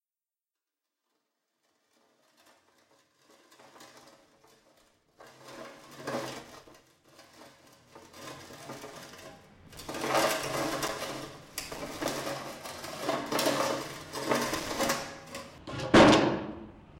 ROSELLO Lisa 2014 2015 scraping shovel
SON2
18 secondes
scraping shovel
effects : Melt opening, change the speed 10,29 % (sec 15,60 till the end)
Profil mélodique ascendant puis descendant
Profil de masse : Dillatation
Grain de resonnance
Classe de masse : son cannelé
Allure naturelle
typo : W